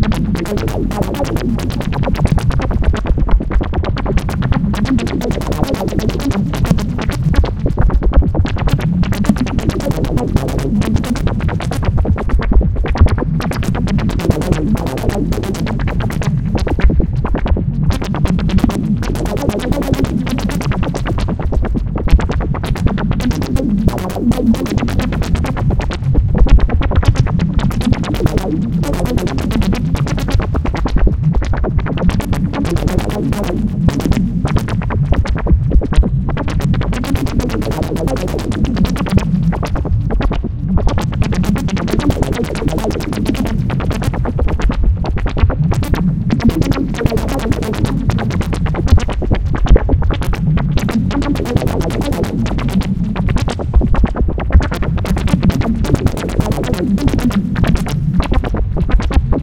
generative modular acid line sequenced with my own custom script that is specialized for non-quantized sort of strings of notes. I mangled the envelope quite a bit though so it just sounds more swingy than anything I guess. Not sure what the BPM would be. Would be better if it were cut up for one-shot sounds maybe